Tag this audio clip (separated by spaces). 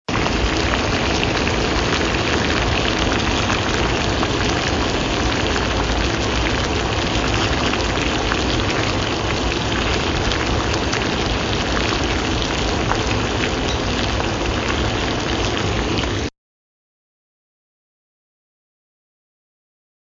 bubbles liquid